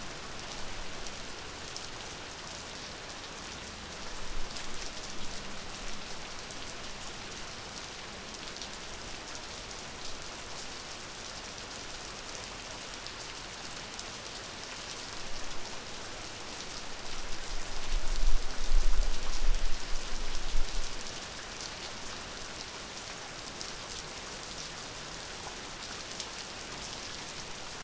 rain session 27sec 2007
Medium rain with big drops falling on wet concrete road. Recorded with Oktava 102 microphone and Behringer UB1202 mixer.
field-recording, nature, rain, storm